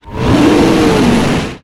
Dinosaur Scream
A big monstrous creature screaming. Could be a dragon, could be a dinosaur.
Created by time/speed shifting and even paulstretching of pushing a container over the flow and a female scream.
Plaintext:
HTML: